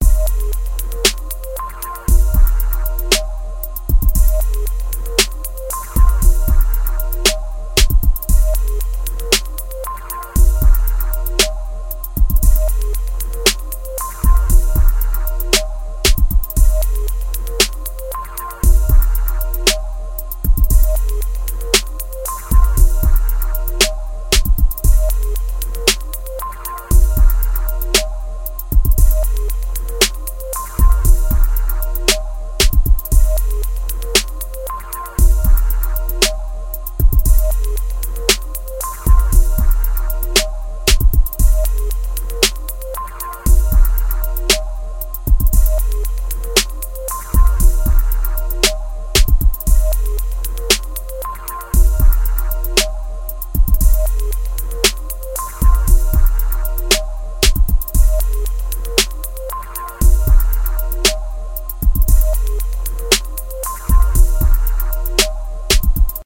Sparkling ending
Sparkling elements from ASR-X-PRO Soundbank, loopable
kick, streophonic, ASRX, sparkling